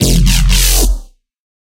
A Dubstep Reese Bass Part Of My Reese Bass Sample Pack